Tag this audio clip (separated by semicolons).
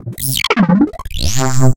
Processed; Trance; Psytrance; Fx; Dance